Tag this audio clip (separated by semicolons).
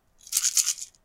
shake; pill; bottle; container; pills